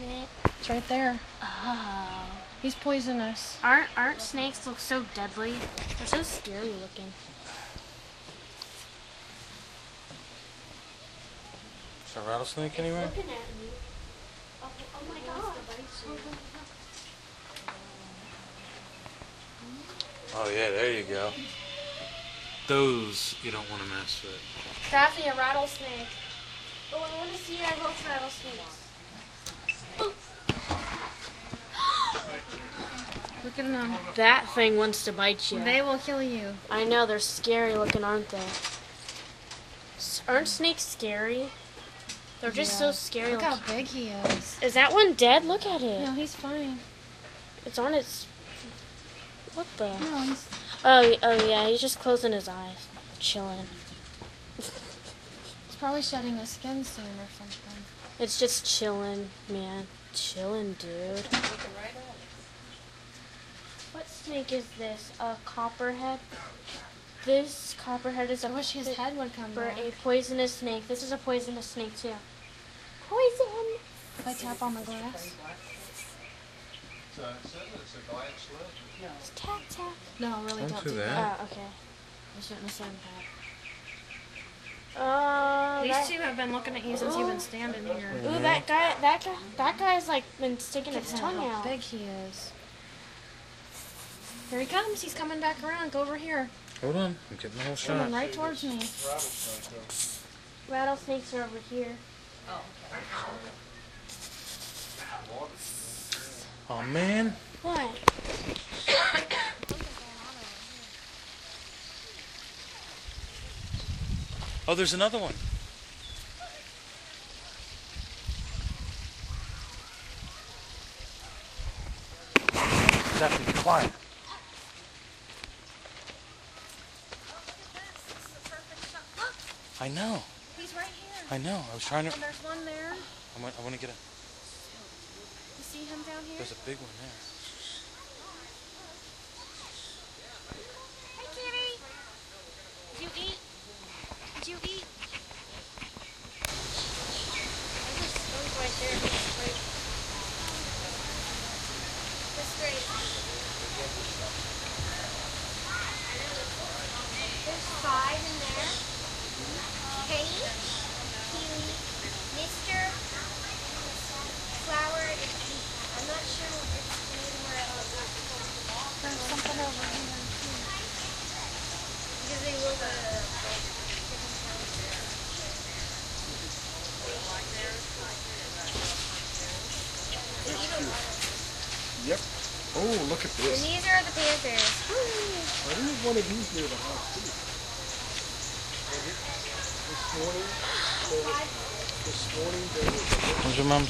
The snake display at the Busch Wildlife Sanctuary recorded with Olympus DS-40.